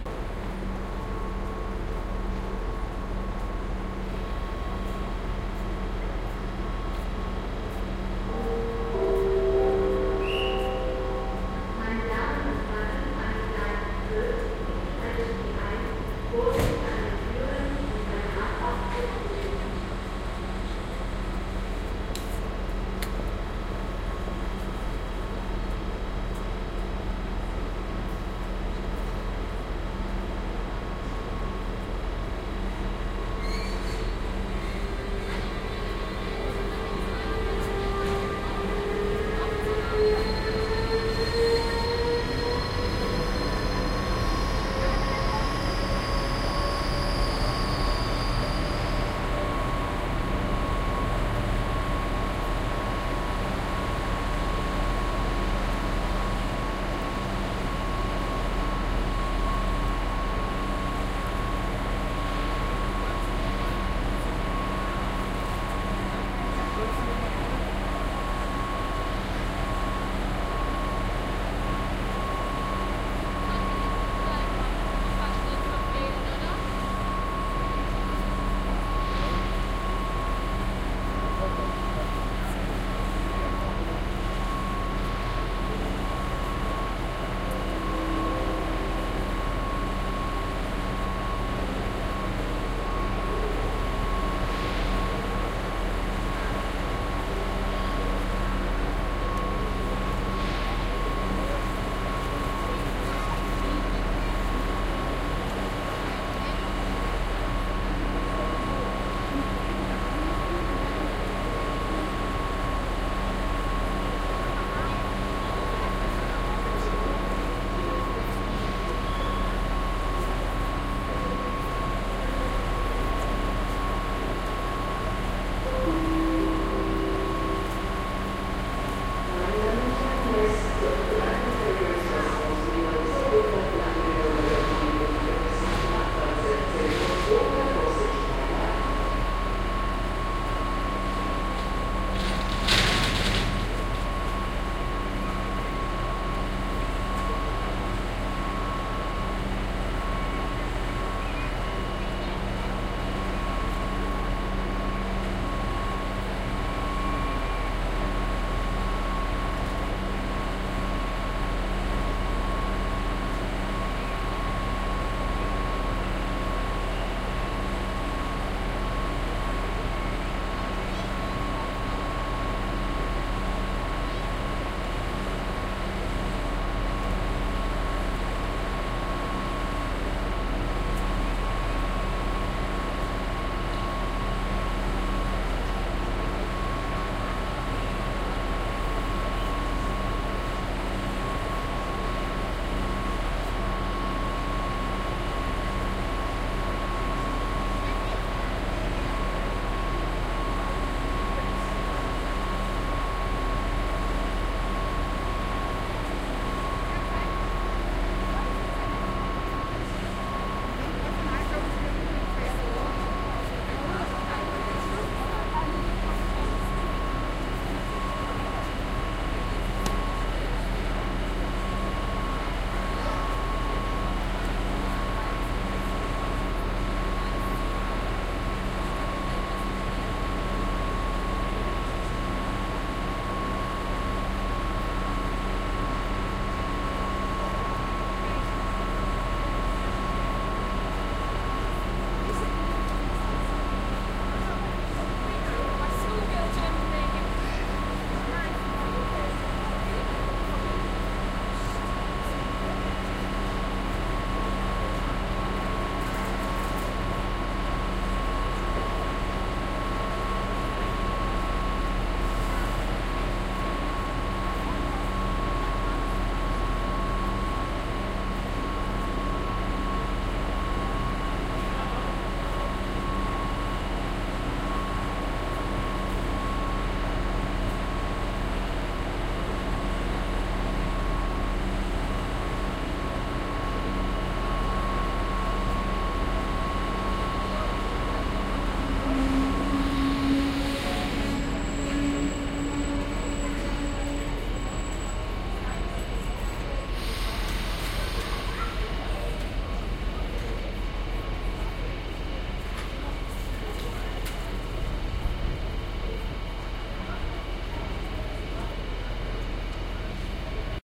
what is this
On a platform at Stuttgart station
This track was recorded on a platform of Stuttgart station, next to a train, which then departs. The track was recorded on the 4th of September 2007 with a Sharp MD-DR 470H minidisk player and the Soundman OKM II binaural microphones.
bahnhof, binaural, field-recording, railwaystation, station, stuttgart21, train